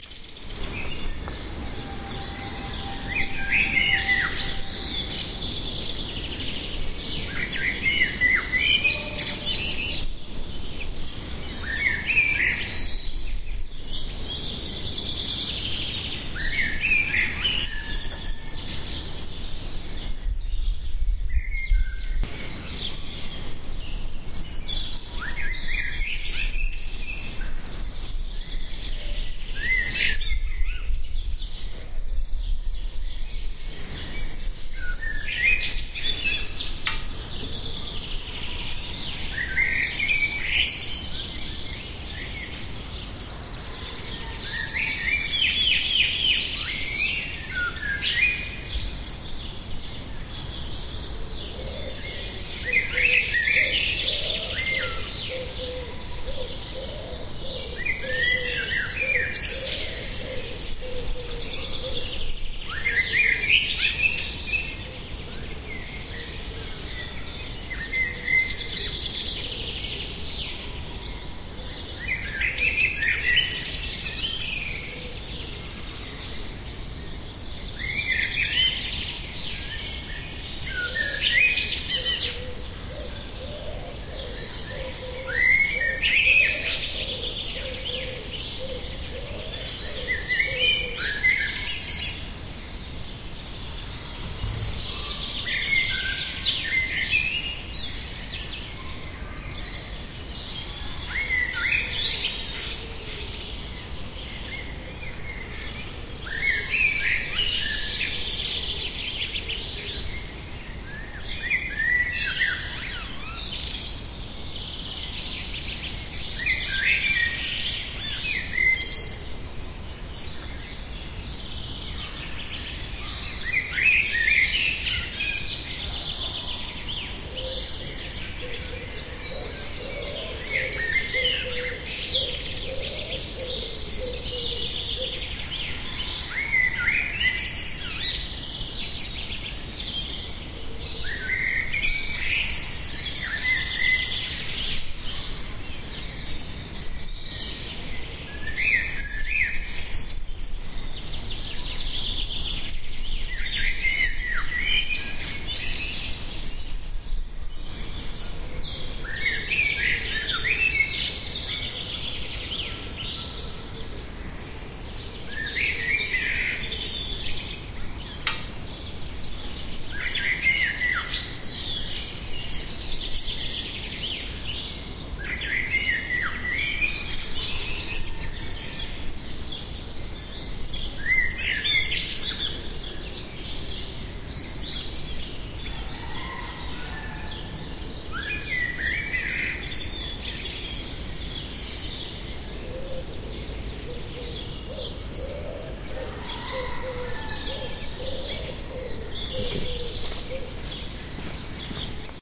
birds of holland
The is the sounds of noisy birds after a rainstorm when they all come out to squawk. Taken in Central Holland in a remote wooded area. Great for background noice. Easily looped.
background-sounds, birds